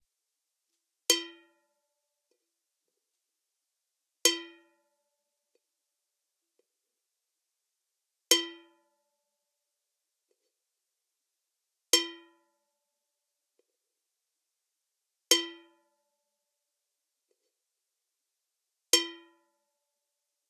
Rubberband hitting can
Rubber band hitting a can to recreate a pluck or instrument sound
pluck, rubberband, OWI